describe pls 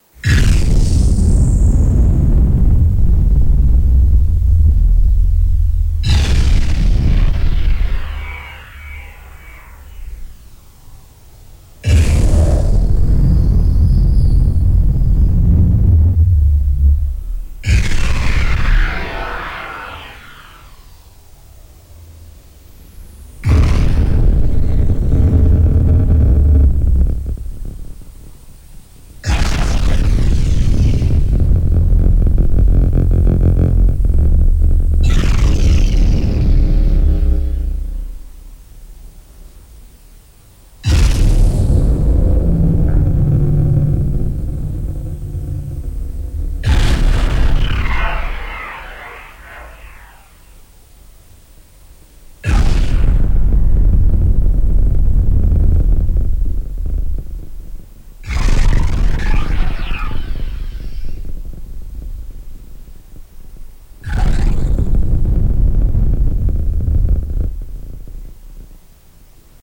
NUOVI SPARI ROBOT
processing the usual metallic sound with pro tools plug in, here is the sound